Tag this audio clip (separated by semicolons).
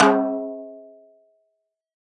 snare; 1-shot; multisample